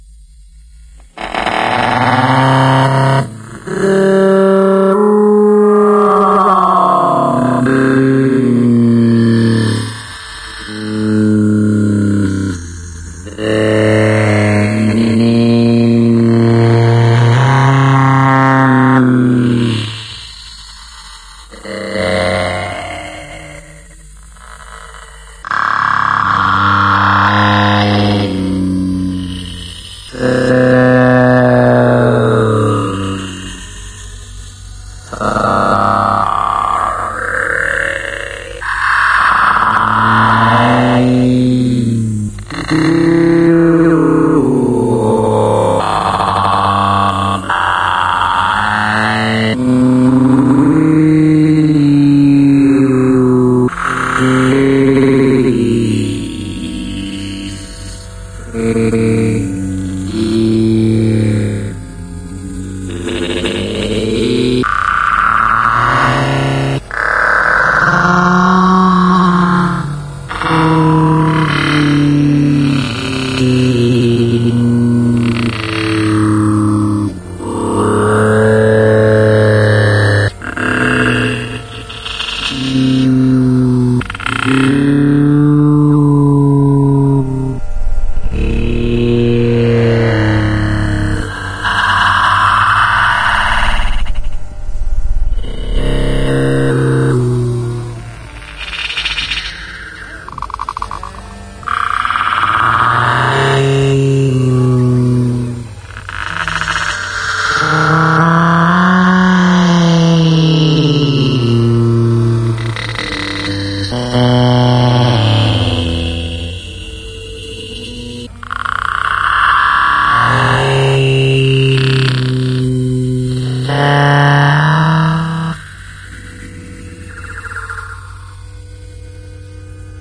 Engine of the future in car chase